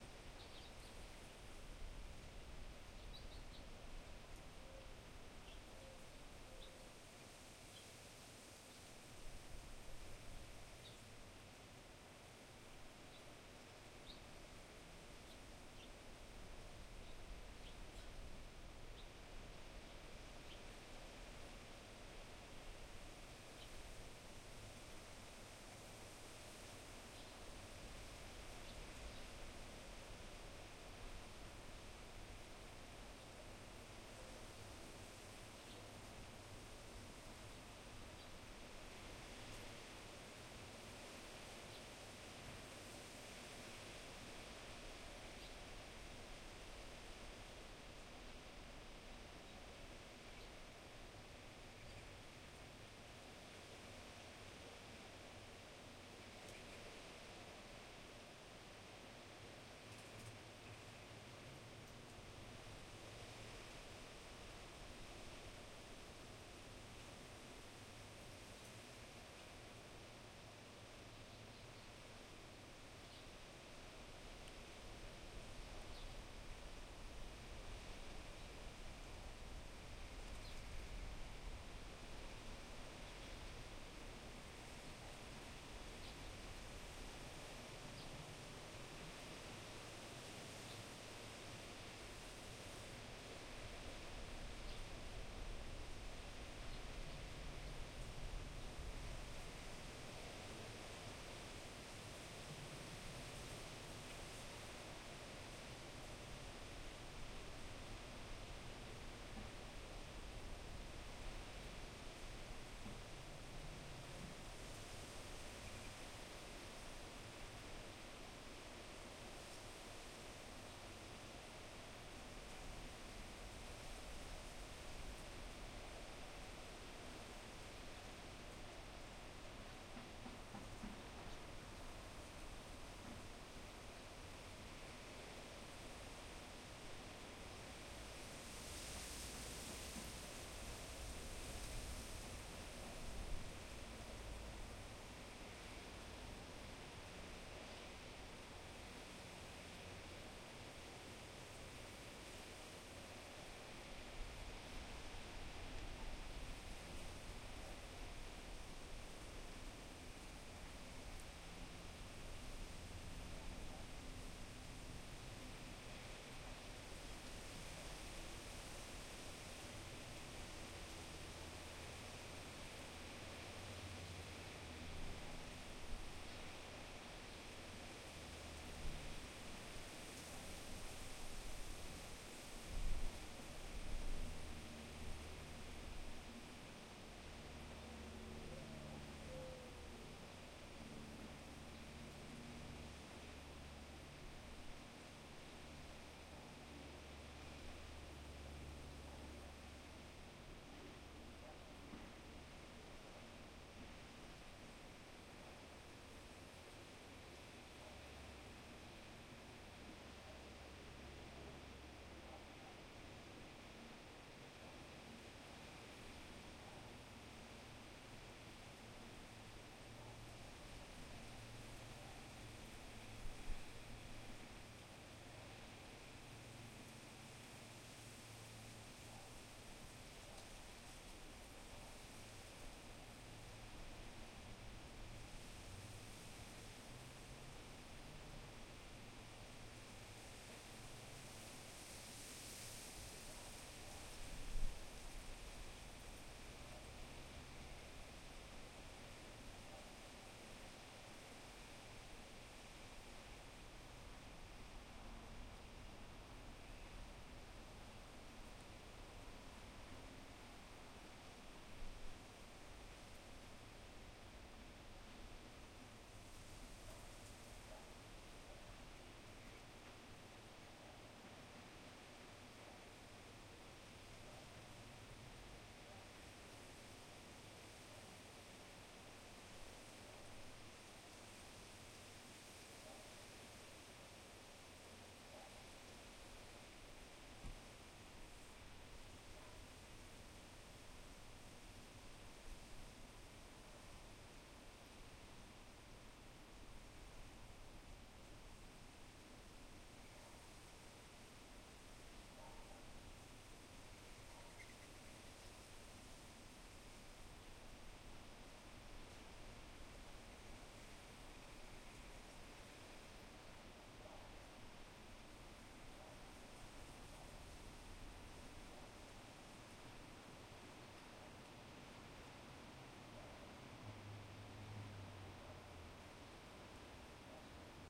Made this recording to share with the community. Recorded what I was hearing outside my bedroom window. It's a combination of various sounds for about 33 minutes. I did normalize the track a little bit and added a Low Shelving EQ filter. There are mic bumps and movements that you'll need to take out or edit out. I hope it's may be useful for your project. The rustling sound coming from Palm Trees can be used for other types of trees blowing in the wind.